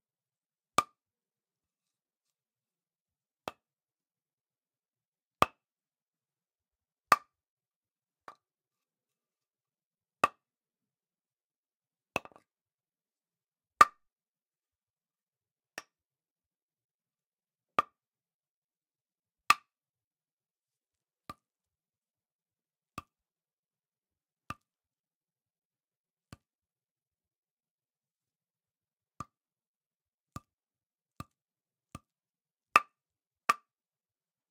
Hammer Hitting Log
A hammer hitting against a log
hammer, hit, log